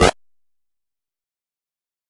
Attack Zound-47
A short electronic sound effect similar to "Attack Zound-46" but lower in pitch. This sound was created using the Waldorf Attack VSTi within Cubase SX.
electronic, soundeffect